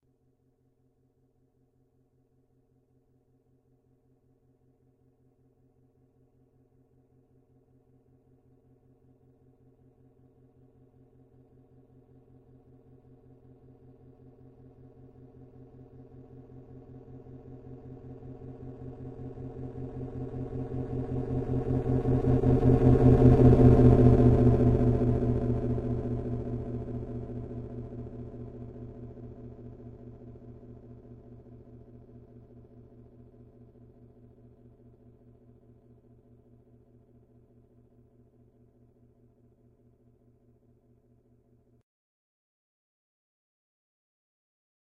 A sort of kind of space truck sounding thing I created in Adobe audition.